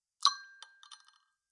plastic item fell in a glass of water5